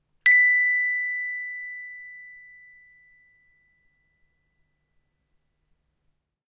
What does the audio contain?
Hand Bells, B, Single
A single hand bell strike of the note B.
An example of how you might credit is by putting this in the description/credits:
The sound was recorded using a "H1 Zoom V2 recorder" on 15th March 2016.
single, bells, b, instrument, bell, percussion, hand